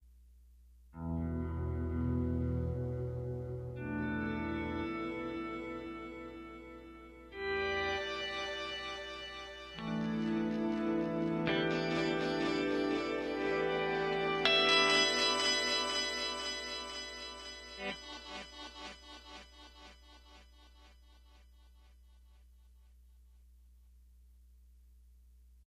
Parallel Harmonics 1
Guitar harmonics played on a Stratocaster with noiseless pickups and a DigiTech Pro Artist processor. Encoded in Cakewalk ProAudio9. Recorded 11/7/11:30PM EST. ZZZZzzzz.
harmonics, guitar